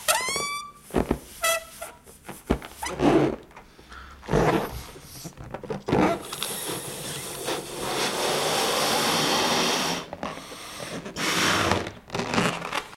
gonflage ballon 2
various noises taken while having fun with balloons.
recorded with a sony MD, then re-recorded on my comp using ableton live and a m-audio usb quattro soundcard. then sliced in audacity.
balloon, fun, inflate